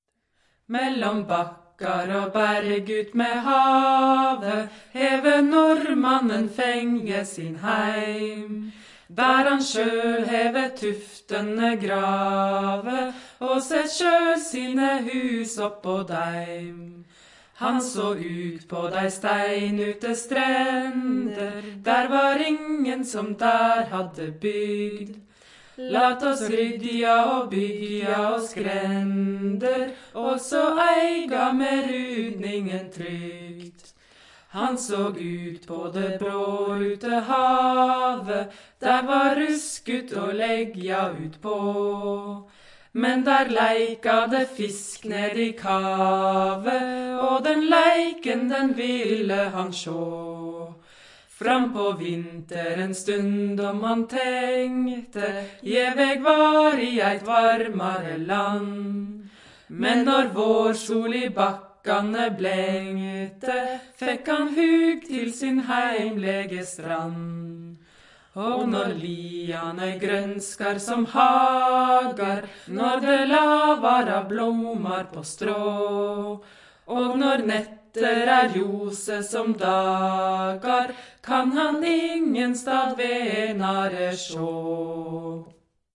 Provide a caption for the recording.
Norwegian folk song, recorded in traditional hut in Nordmarka near Oslo
Norwegian, hut, folk